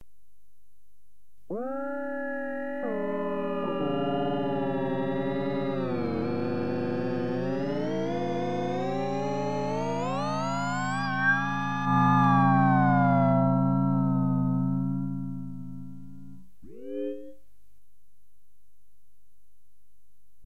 tiedonsiirto - knowledge transfer
knowledge-tranfer into brain from computer, imaginary sound, recorded with fostex vf16 and made with nordlead2
imaginary, knowledge-transfer, scifi, synthetic